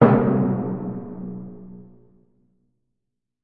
A simple "failure" sound using a timpani drum with a pitch change on a music-making program called Musescore. Enjoy!